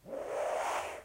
saltar mover volar
mover, saltar, volar